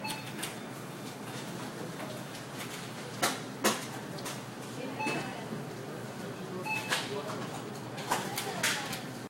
Low-quality audio recording of supermarket checkout line, with beeps from barcode scanner and voices in background.